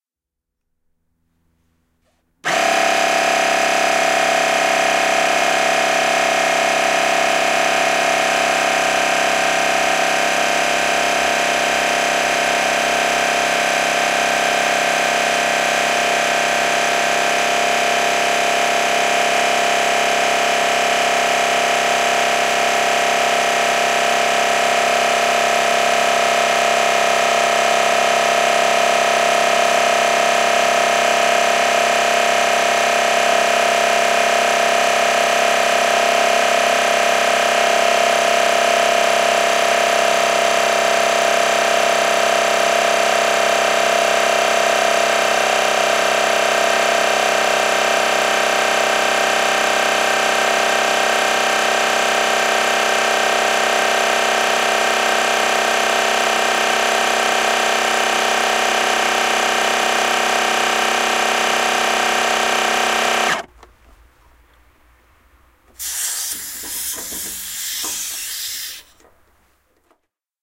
Portable Compressor
A stereo field-recording of a portable 12V compressor pumping air into a tire. Rode NT4 > FEL battery pre-amp > Zoom H2 line in.